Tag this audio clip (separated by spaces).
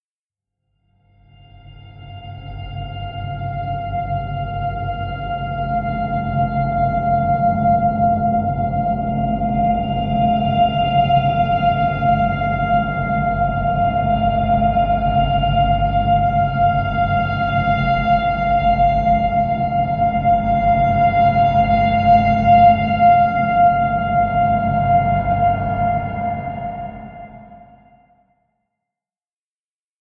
ambient multisample atmosphere drone